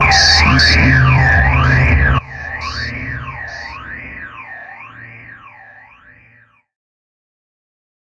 Broken Transmission Pads: C2 note, random gabbled modulated sounds using Absynth 5. Sampled into Ableton with a bit of effects, compression using PSP Compressor2 and PSP Warmer. Vocals sounds to try to make it sound like a garbled transmission or something alien. Crazy sounds is what I do.
glitch, pack, ambient, drone, pads, texture, horror, loop, space, experimental, dark, cinematic, vocal, artificial, synth, atmosphere, industrial, samples, evolving, electronic, granular, soundscape